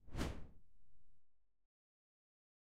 whoosh short low
A simple whoosh effect. Short and low.